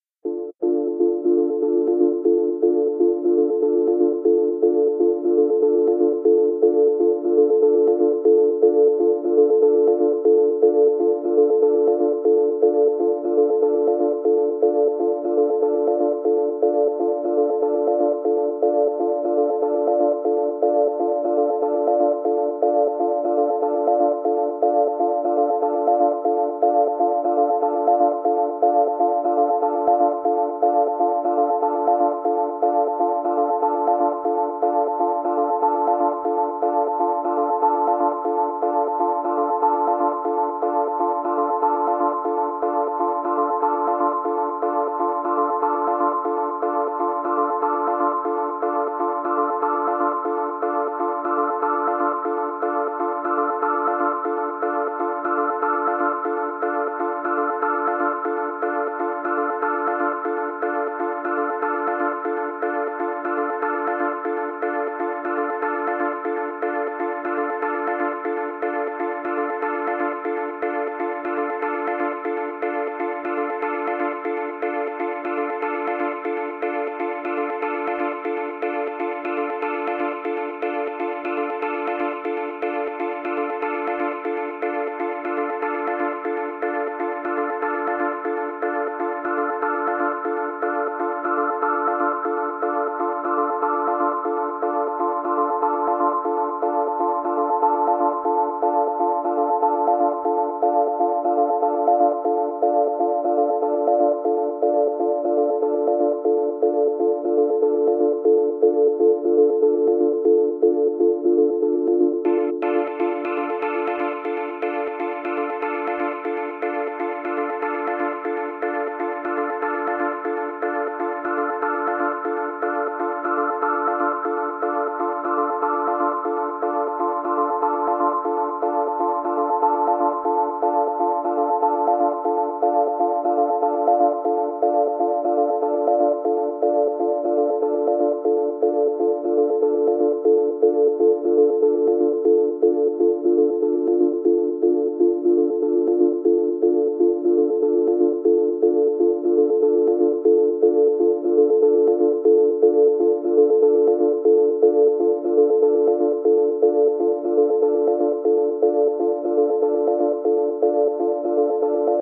Georc DuBoe - Rise N' Shine pad track 2
Some basic synth with filter and effect
Acid, Psychedelic, Experimental, Mental, TB-03
loop, trance, electronic, acid